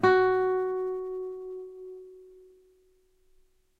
2 octave f#, on a nylon strung guitar. belongs to samplepack "Notes on nylon guitar".
strings, note, nylon, music, f, string, guitar